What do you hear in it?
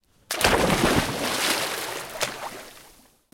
Splash Pool
Audio of someone jumping into a swimming pool 3.5m x 7m.